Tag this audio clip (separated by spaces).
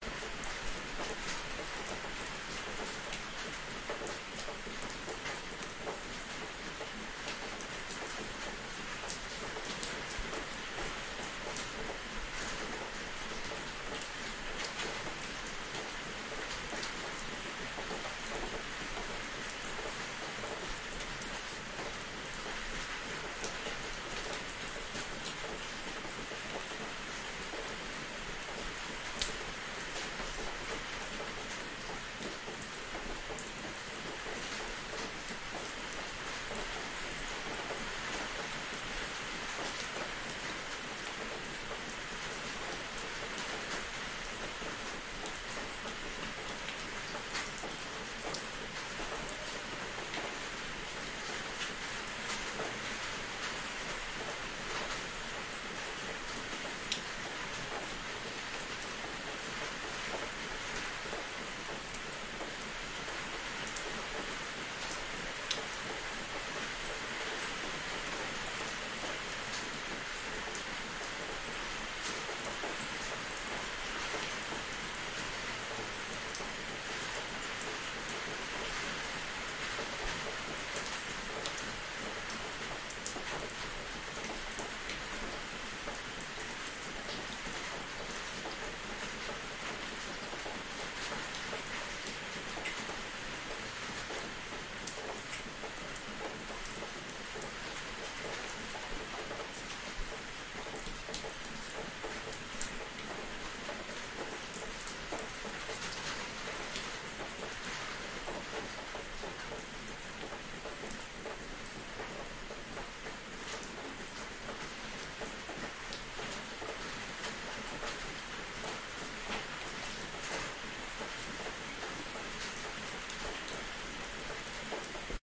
field-recording nature rain storm thunder-storm weather